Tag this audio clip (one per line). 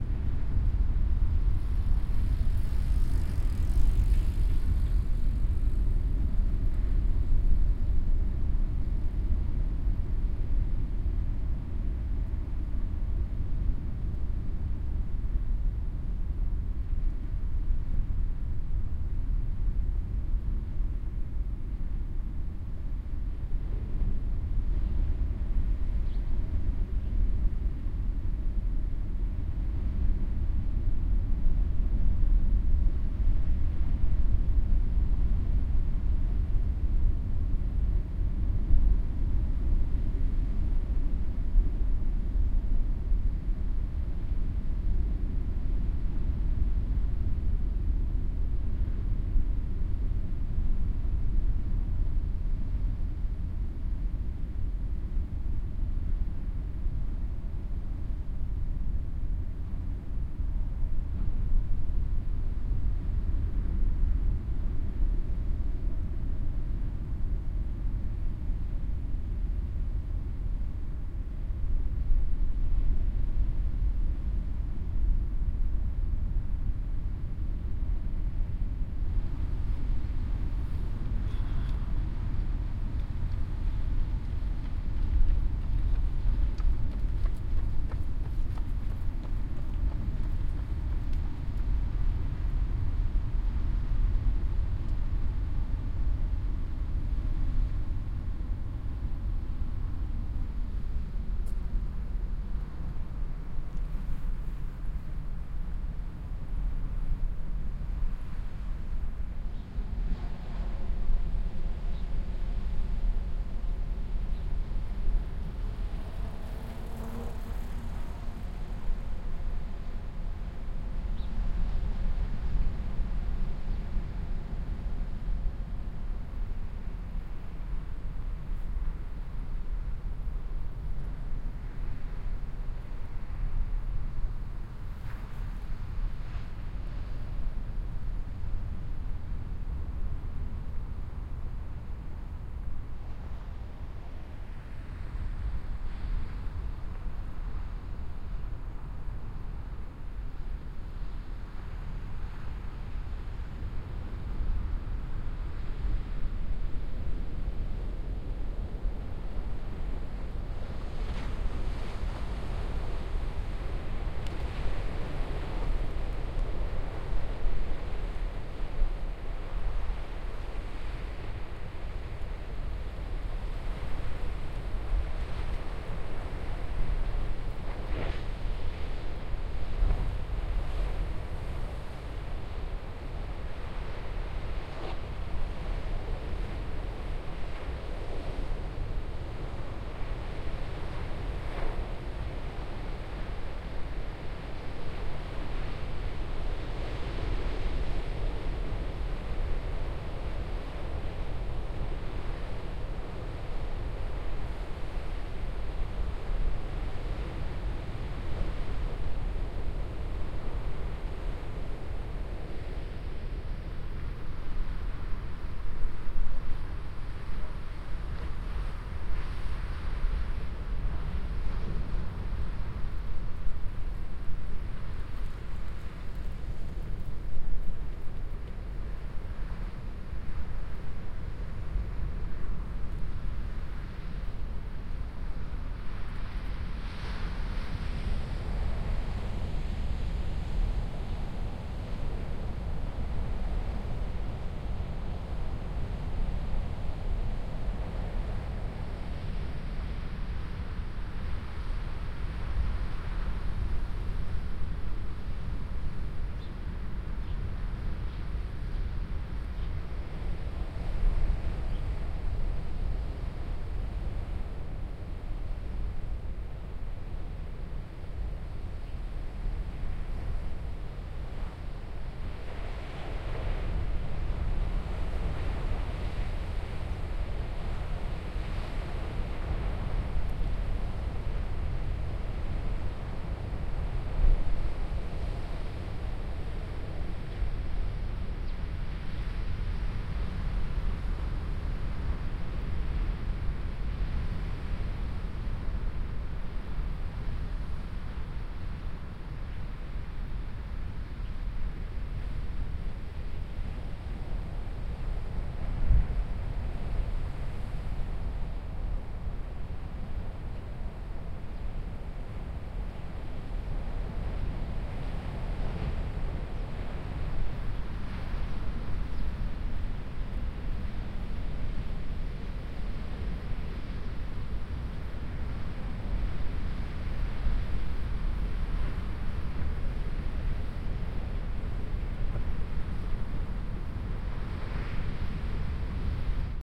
ocean
tide
rock
sea
wave
waves
field-recording
water
wind
beach
binaural
atlantic
spring
sea-side
storm
surf
sand